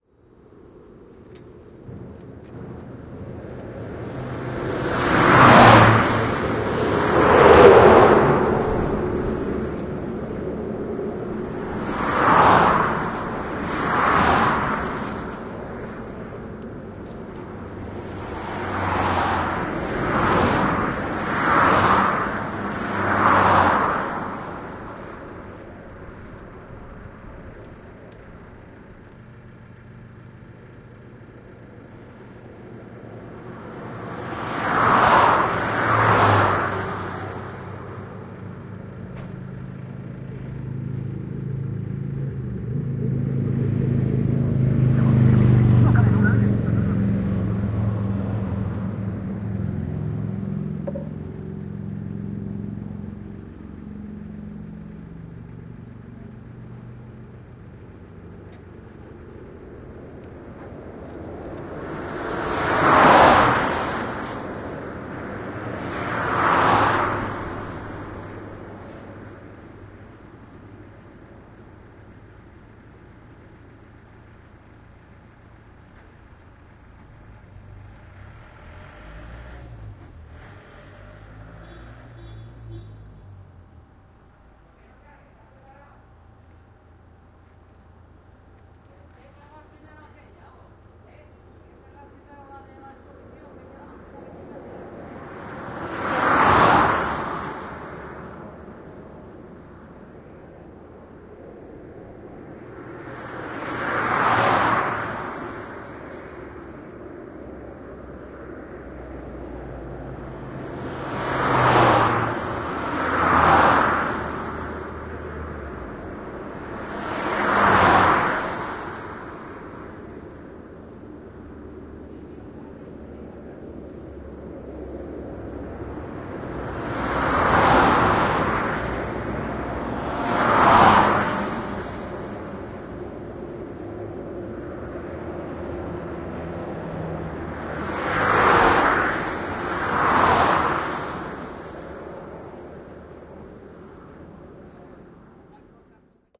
Several cars cross the highway at high speed
The recording was made very near of the highway. Several cars, motorcycles and trucks passing.
ambient, cars, field-recording, highway, speed